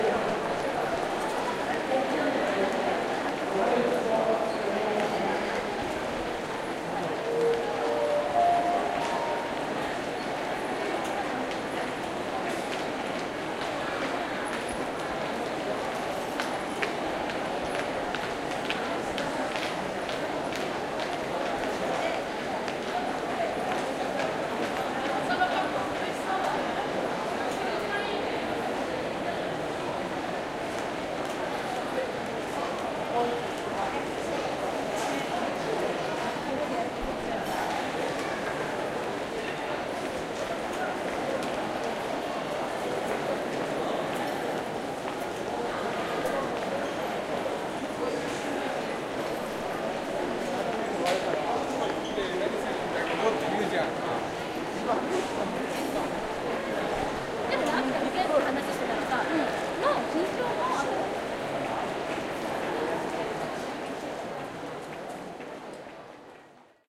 Ben Shewmaker - Omiya Train Station
Upstairs at Omiya train station when it is quite busy.
omiya, people, train